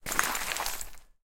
Gathering, Ice, A
Audio of picking up several small chunks of ice on top of snow-laden concrete with a pair of gloves. The recorder was approximately 1 meter from the ice.
An example of how you might credit is by putting this in the description/credits:
The sound was recorded using a "Zoom H6 (XY) recorder" on 1st March 2018.
gather, gathering, ice, icy, pick, pile, snow, up, winter